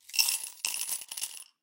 COINS IN A GLASS 16
Icelandic kronas being dropped into a glass
coin, Coins, currency, dime, glass, money, penny